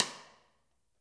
prac - sidestick
drums, percussion, sidestick, wood-block